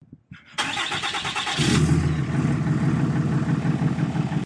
Truck-Diesel 10dodge start
diesel, truck